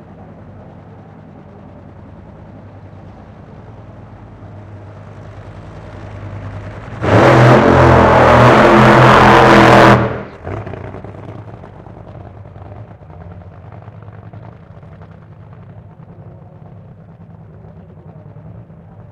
Top Fuel Burn Out 1 - Santa Pod (B)
Recorded using a Sony PCM-D50 at Santa Pod raceway in the UK.